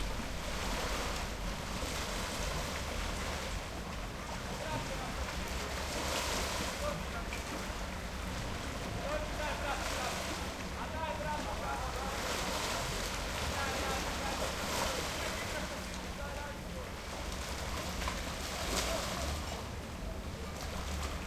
the boats are floating on sea on the left guys are playing waterpolo
beach, boats, kostrena, rijeka, sea, waterpolo